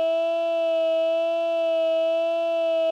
The vowel “A" ordered within a standard scale of one octave starting with root.
vowel, speech, formant, supercollider, robot, a, voice